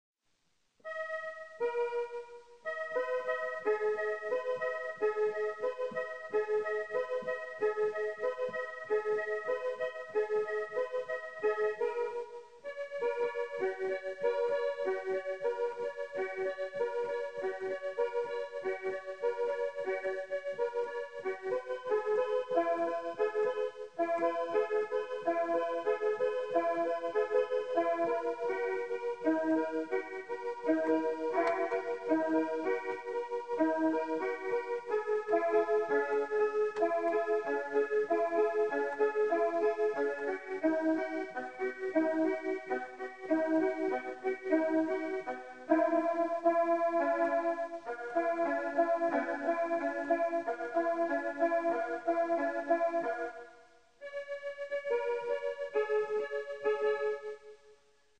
Suitable for a scene of one person concerned about another.
idoneo para una escena de una persona preocupada por otra
concert, scared, thinking